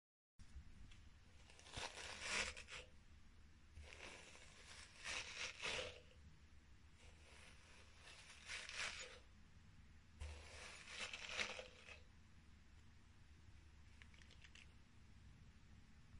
this is a short hairbrush sound. i used it for a close up of mascara